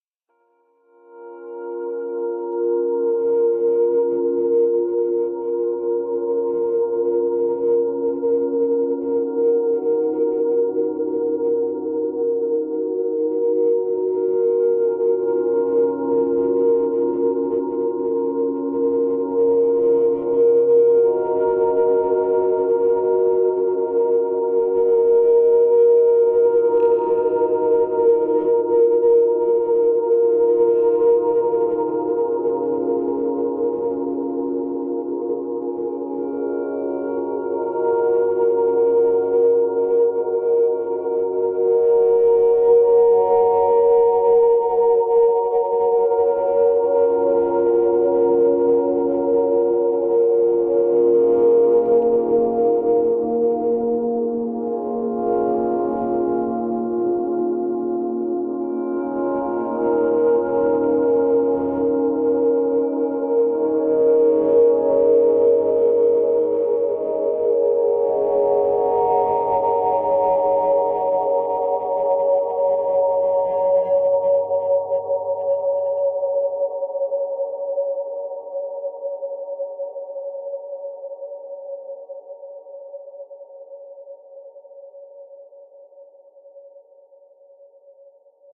Rhodes volume turned by hand like some waves. Reverb, distortion, echo. These make endless textures.
ambient, atmosphere, dreamy, drone, piano, rhodes